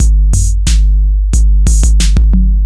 90 tr808 phat drums 03
phat 808 drums
phat, hiphop, free, 808, drums, beats, roland